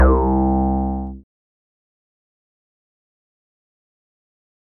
A acid one-shot sound sample created by remixing the sounds of